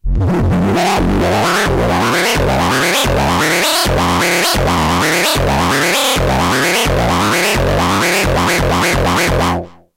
Electronic Noise #57 (distorted)
digital, sfx, sound, synth